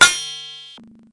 Glitched snare drums. The original samples were uploaded by user pjcohen.